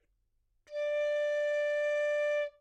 Part of the Good-sounds dataset of monophonic instrumental sounds.
instrument::piccolo
note::D
octave::5
midi note::62
good-sounds-id::8205